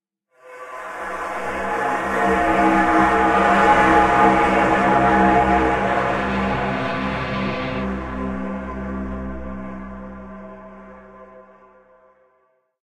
cinema transient atmosph
Digital sound desing.
Waves: Gladiator-AT Crowd MF and a tenor choir voice
digital, sfx, fx, strange, soundeffect, effect, freaky, sound, sounddesign, abstract, desing, sound-design, future, electric, sci-fi, weird